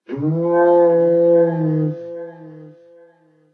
Created entirely in cool edit in response to friendly dragon post using my voice a cat and some processing.
animal, cat, dragon, processed, voice